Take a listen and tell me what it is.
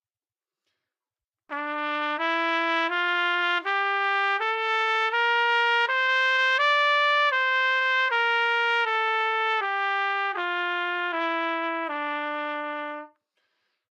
Trumpet - Csharp natural minor
Part of the Good-sounds dataset of monophonic instrumental sounds.
instrument::trumpet
note::Csharp
good-sounds-id::7298
mode::natural minor
minor, Csharpnatural, scale, trumpet, good-sounds, neumann-U87